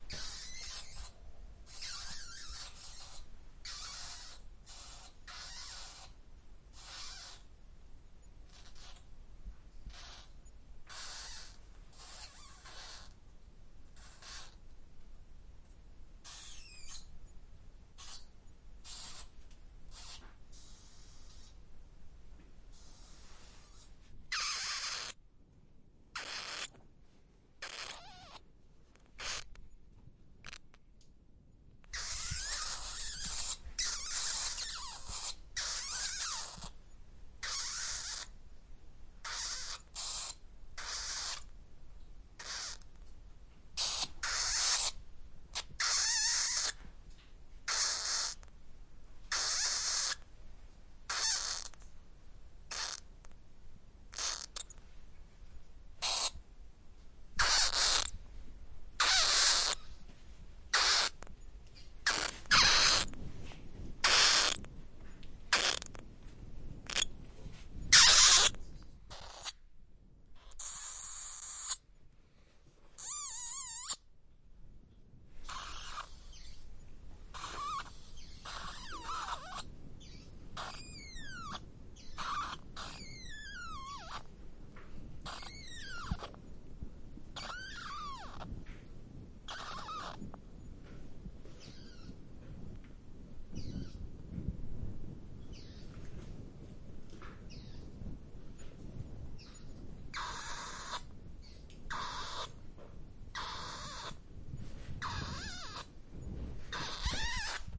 This sound was recorded in a cave "Ledyanaya" near the town of Staritsa in Russia.